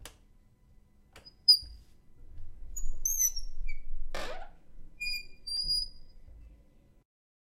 Door Squeak
Squeak of a wooden door with metal hinges.
door, squeak